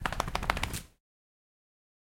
004 - Dog Shaking
Shake, Shaking, Field-Recording, Foley, Dog